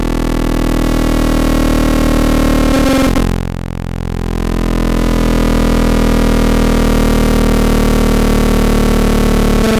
APC-2Swells
APC, Atari-Punk-Console, diy, drone, glitch, Lo-Fi, noise